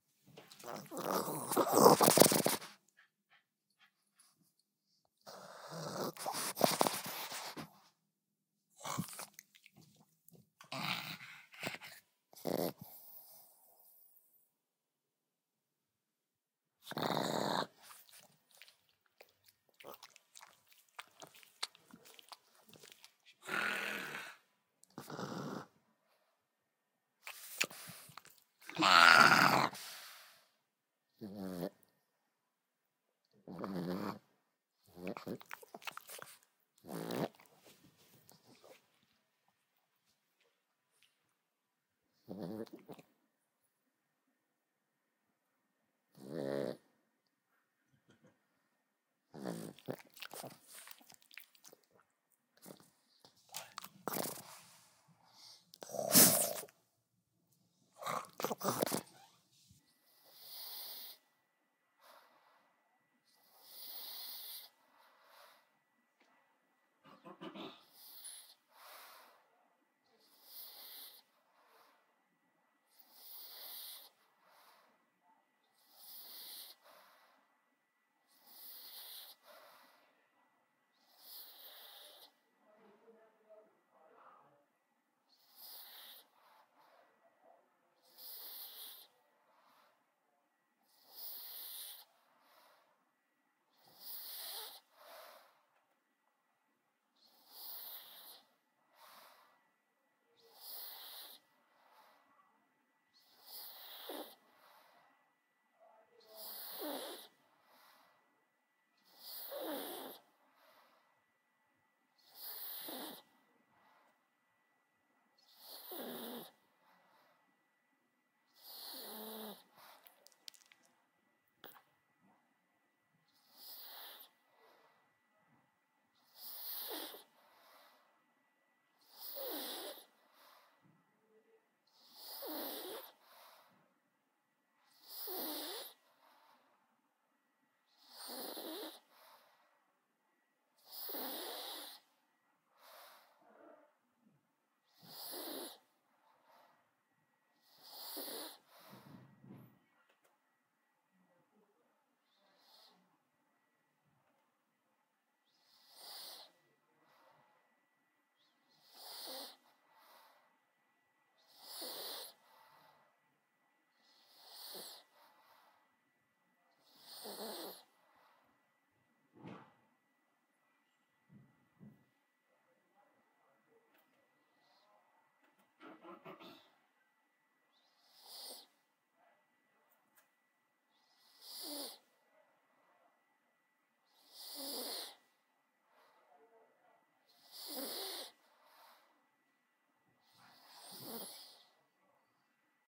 Boston Terrier, snarls, snorts, breathing
Boston terrier, breathing sounds and snorts